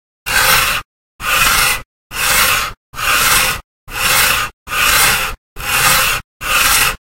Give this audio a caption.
Dragging wood across carpet
dragging end of a longboard across a carpet floor
longboard, MUS152, carpet